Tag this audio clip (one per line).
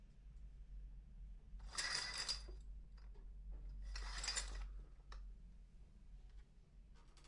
Blinds
curtains
scourer